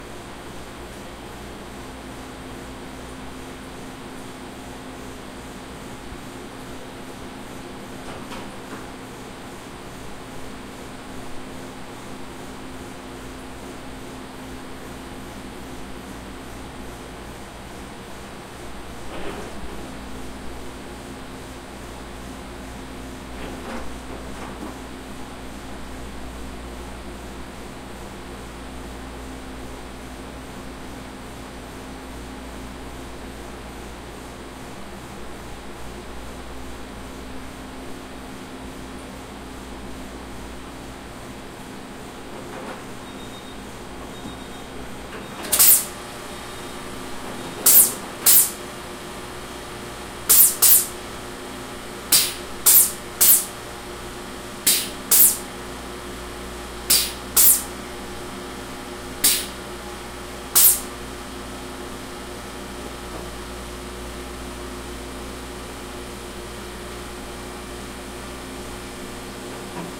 MOVIE THEATER BEHIND CONCESSION 01
A roomtone of behind the concession stand near the CO2 tanks for the soda machines. Recorded with a Tascam DR-40
ambience, theater, concession, roomtone, movie